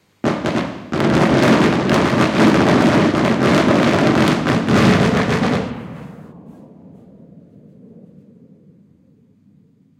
a rapid sequence of explosions from fireworks
bang, blast, bombardment, cannonade, cracker, detonation, environmental-sounds-research, explosion, field-recording, fireworks, fusillade, hail